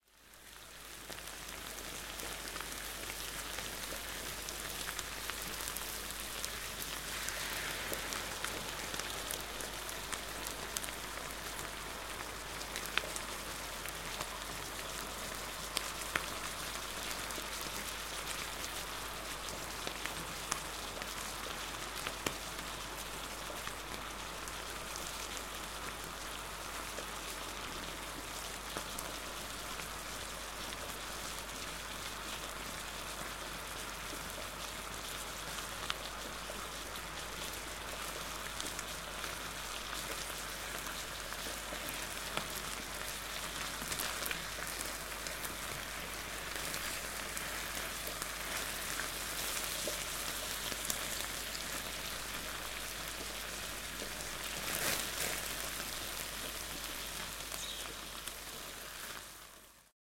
Frying Sausage in a frying pan on the stove.
OWI, Sausage, Frying, Pan, Rain, Sizzle, Food, Meat